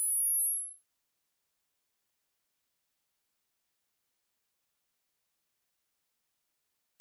Foley Generic Camera Flash Charge2
household, car, door, bedroom, can, trash-can, camera, kodak, film, step, common, switch, jump, garage, foley, trash, light, foot, house